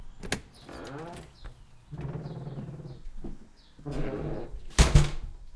ns doorOutside
A creaky front door - recorded from the outside
outside, door